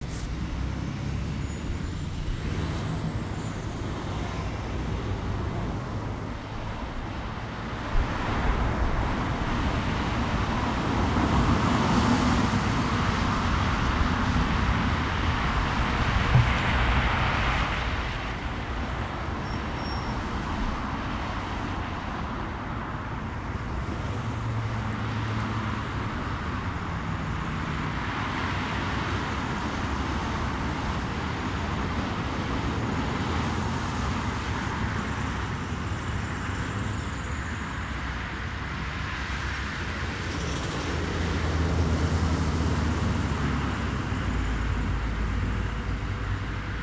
Street Traffic
Ambient noise from the side of the road
passing-cars, field-recording, street